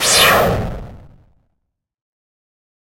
This is a sound effect I created using Bfxr.
future, game, motion, sci-fi, videogame